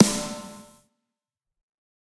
Snare Of God Drier 023
realistic, set, drum, pack, drumset, snare, kit